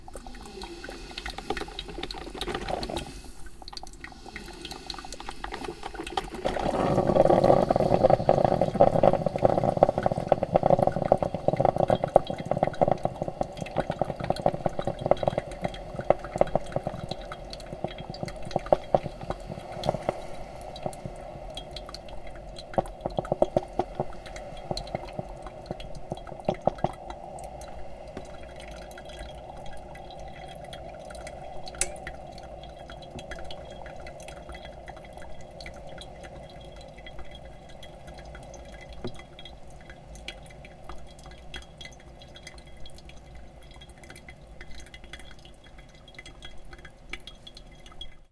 ZOOM H4 recording of the noises a coffee pot makes, with the exceptionally loud gurgling noise as the process ends.
coffee, coffee-maker, gurgling, making